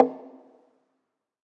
Recordings of different percussive sounds from abandoned small wave power plant. Tascam DR-100.
percussion, fx, industrial, hit, ambient, field-recording, drum, metal